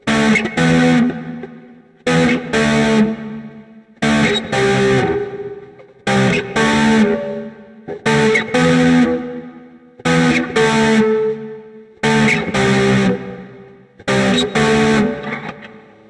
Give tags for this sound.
120; electric; guitar; raw; rhythm

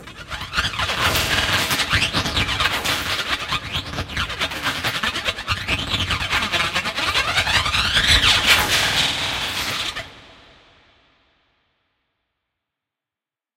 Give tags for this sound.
effect; sfx; fx; sound

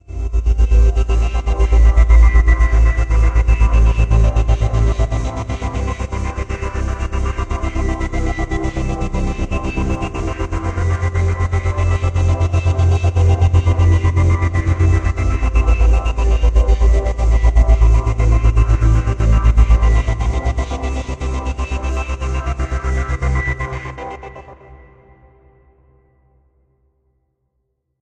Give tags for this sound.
air; airy; angelic; choral; chord; complex; drone; emotional; ethereal; fat; gate; pad; progression; pulse; rhythmic; smooth; wide

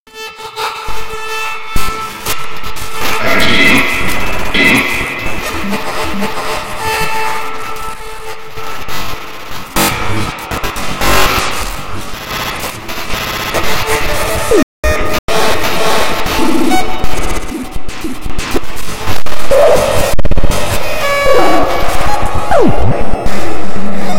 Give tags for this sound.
glitch; noise-dub; useless; nifty; silly; mangled; noise

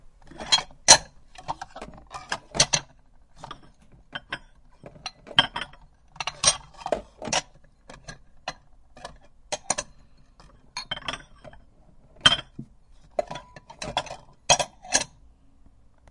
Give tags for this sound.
dishes; kitchen; plate; plates; table; tableware

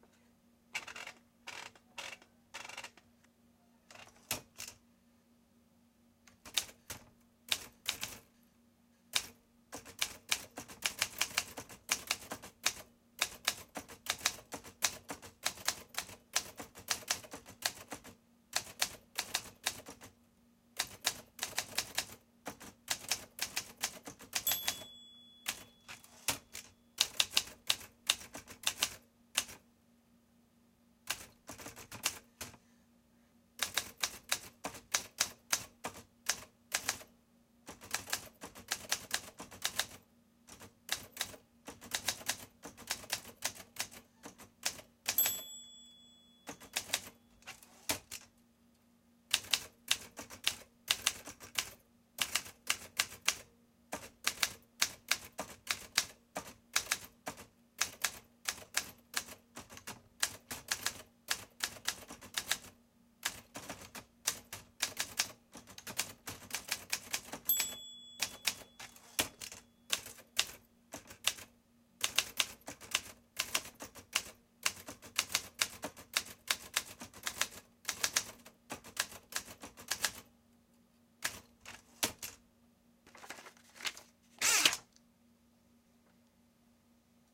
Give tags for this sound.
environmental-sounds-research; soundeffect; typewriter

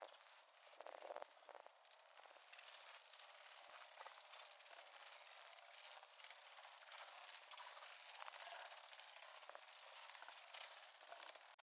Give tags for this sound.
distant; field-recording

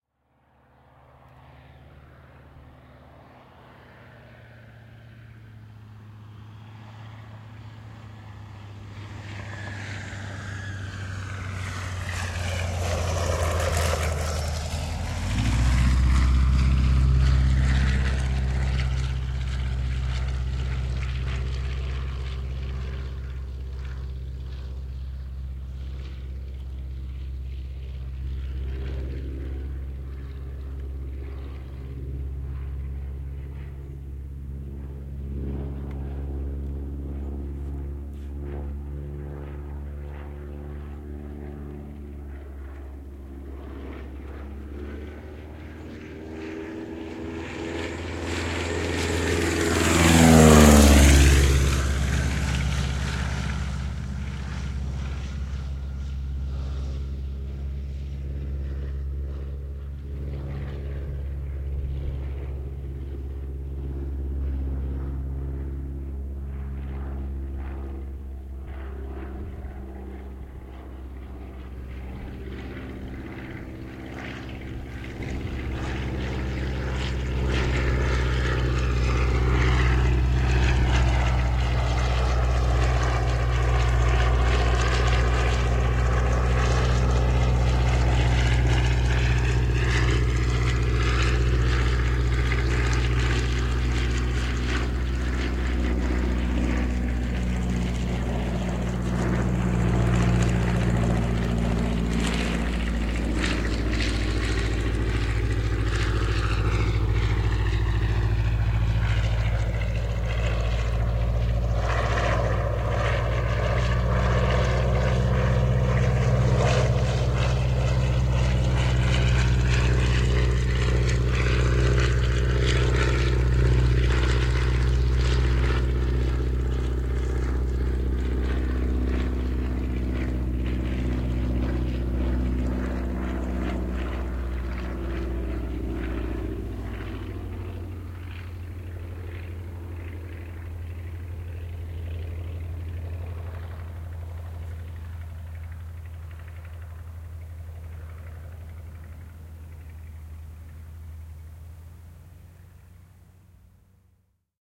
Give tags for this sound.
Air-travel; Aviation; Finland; Finnish-Broadcasting-Company; Lentokoneet; Suomi; Tehosteet; Wheel; Yle

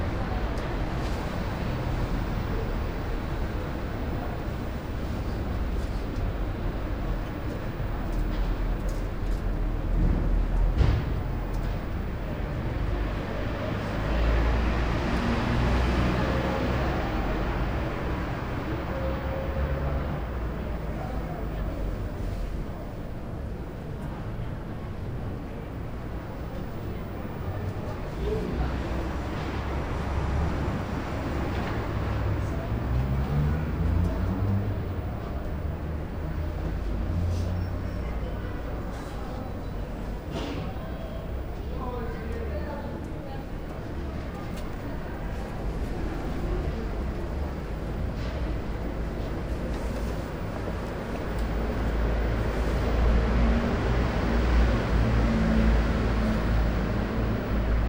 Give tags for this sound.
street; ambience

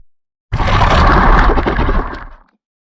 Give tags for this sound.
creatures; creature; roar; shout; growls

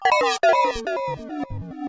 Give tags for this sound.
electro; leftfield; micron; alesis; thumb; idm; small; synth; kat; bass; acid; ambient; glitch; beats

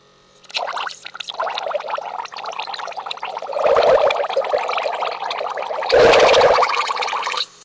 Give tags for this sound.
tape-rewinding; air; strange; noise; bubbles

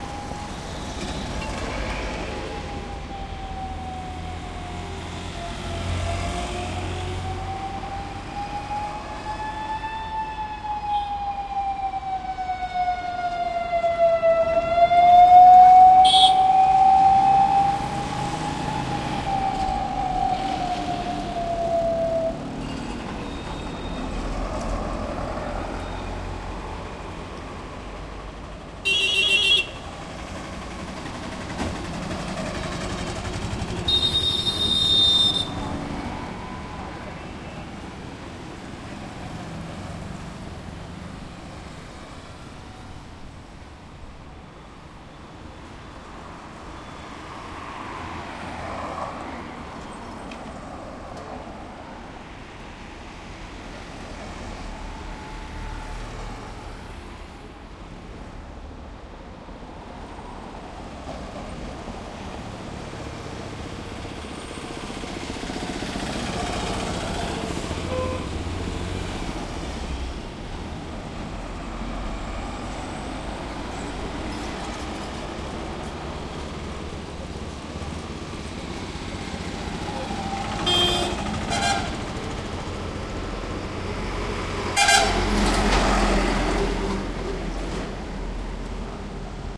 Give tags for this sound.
India; auto-rickshaw; engine; field-recording; horns; police; siren; traffic; truck

